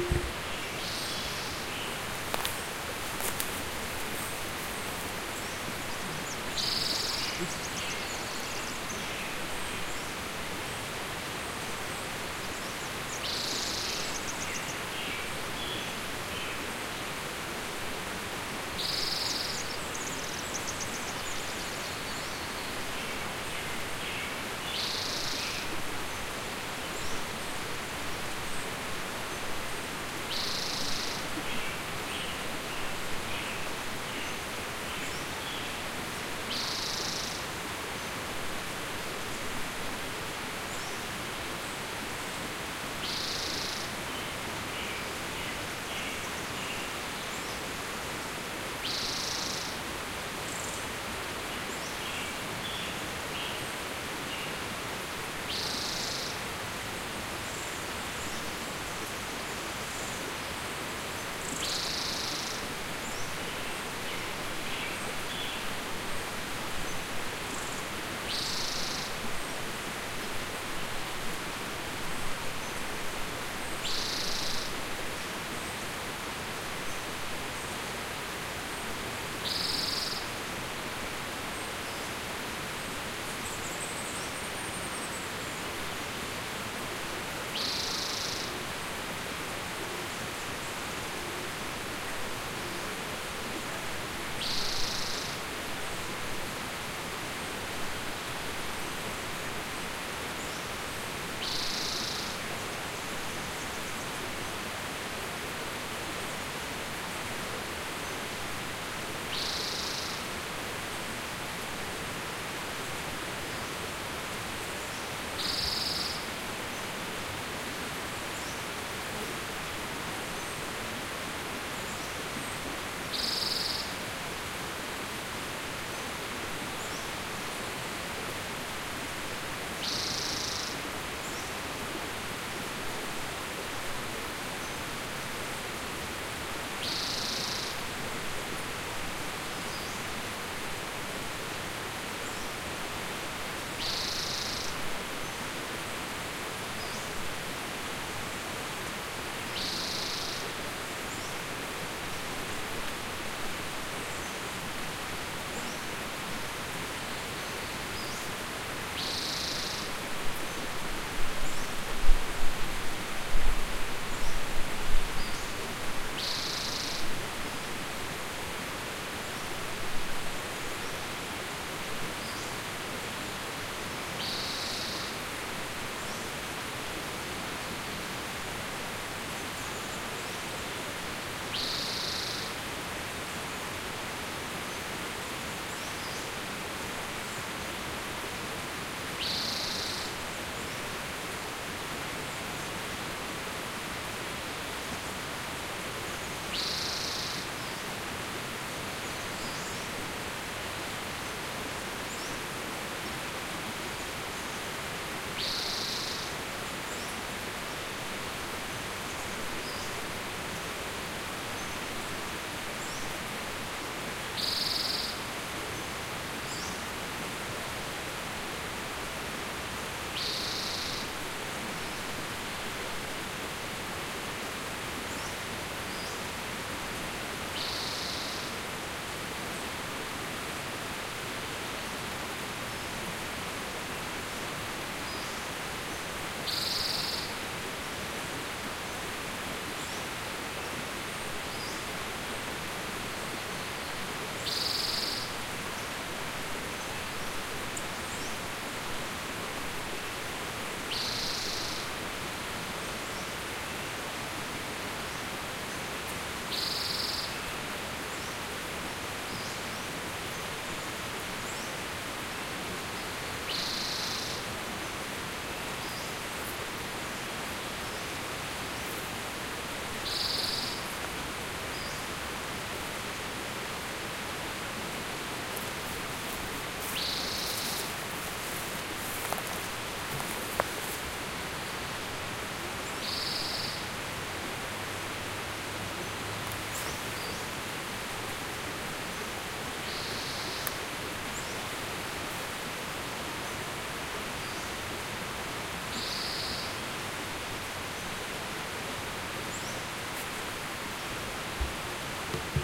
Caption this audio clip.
Bear James Park
Set up my Zoom H4n between a river and a stream on a Spring morning in June.